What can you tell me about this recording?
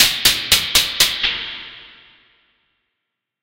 Lever Push
knob, sfx, pull, lever